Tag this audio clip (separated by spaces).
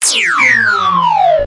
clip fire gun handgun laser noise pistol shot weapon